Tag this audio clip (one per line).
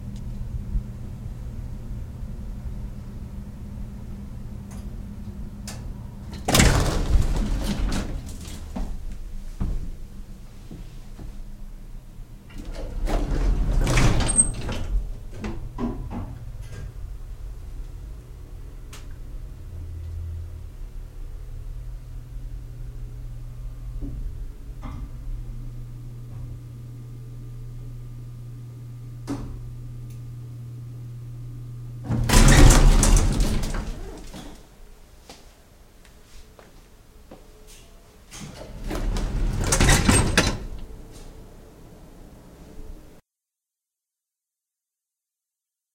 ambience
close
doors
down
elevator
entering
machine
open
slide
steps
up